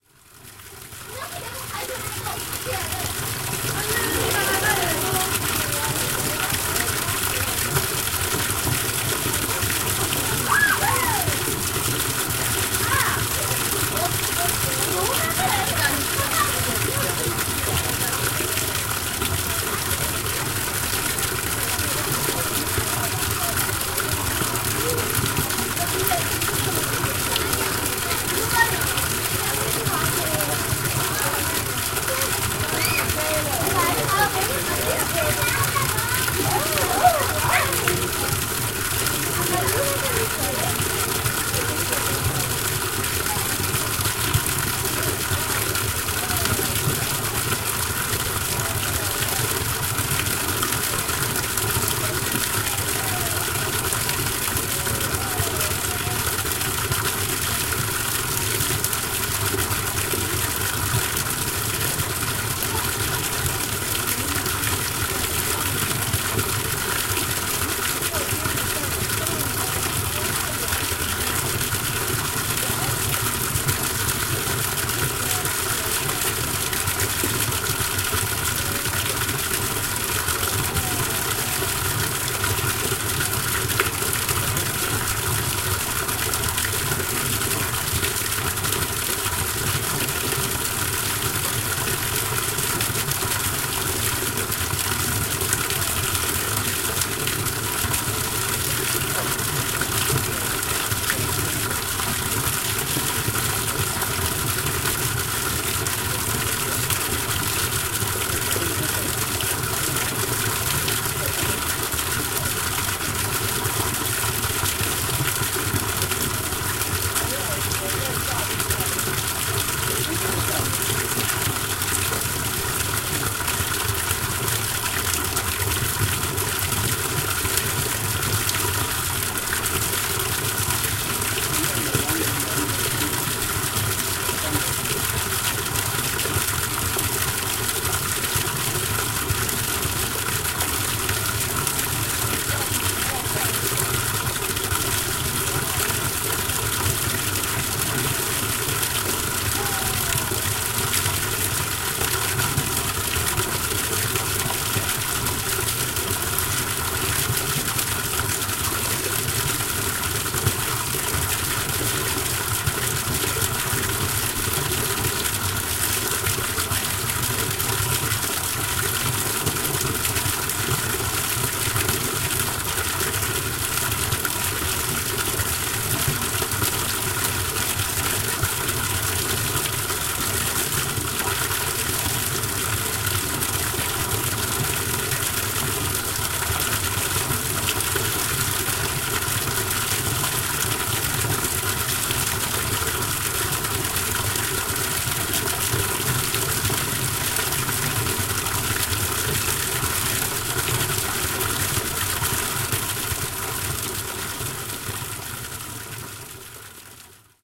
0379 Water flow 2
Water flow. Kids playing in the background at Changdeokgung Palace, Secret Garden.
20120721
korea, seoul